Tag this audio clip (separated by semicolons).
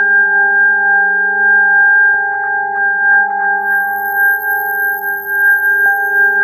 electronic; generative; loop; organ; pad; processed